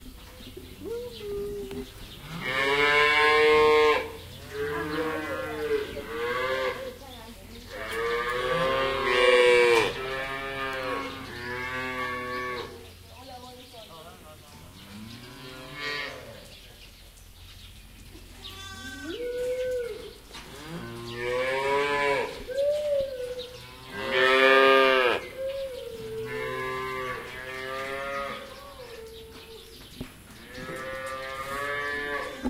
Cow Moos Taiwan Farm 171206
This is recorded inside a milking barn in Hualian, Taiwan, using a ZOOM H2N. The milking hours were not up, so the cows were very excited seeing us entering.
cows-excited, Farm, milking-barn, Taiwan, ZOOM-H2N